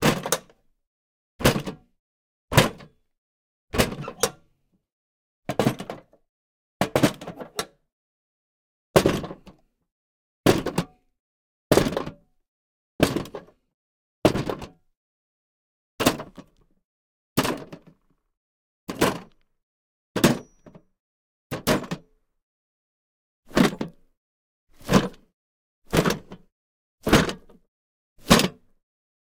Foley Object Toolbox Metal Drop Mono
Metal Toolbox Drop on different surfaces (various intensities) : Concrete (x5) // Wood (x5) // Gravel (x5) // Grass (x5).
Gear : Rode NTG4+
gravel, hit, metal, object, wood